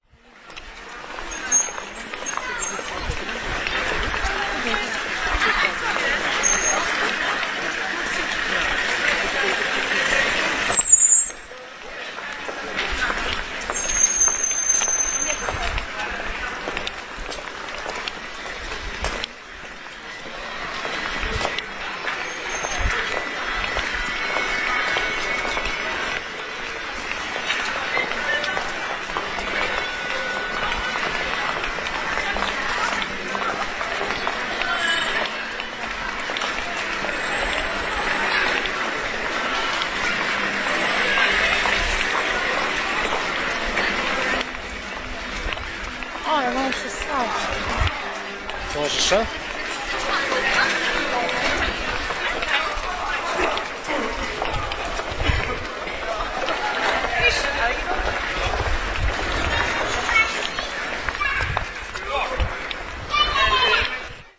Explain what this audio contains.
21.11.09: about 19.00, Półwiejska street in the center of Poznań/Poland. the general ambience: Półwiejska street is the main promenade with a lot of shops in Poznań. always crowded.
no processing
shops, poznan, music, promenade, polwiejska-street, people, shopping, cars, voices, poland, field-recording, crowd, boardwalk